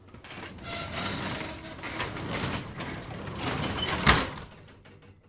Door-Garage Door-Close-04
This is the sound of a typical garbage door being closed.
Close, Door, Garage, Large, Metal